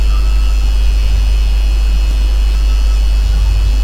Fluorescent light buzzing
Not the loudest, but the humming of my microscope lamp, which is a circle-shaped fluorescent light. the humming came from the body of the microscope. I rested the mic on the body of the microscope and did noise reduction and amplified the audio